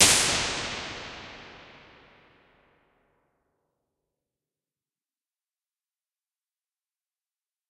Impulse response of a 1986 Alesis Microverb on the Small 6 setting.